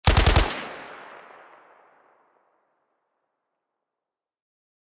Made in ableton, burst fire from random gun.
Layered out of various firearm and mechanical recordings.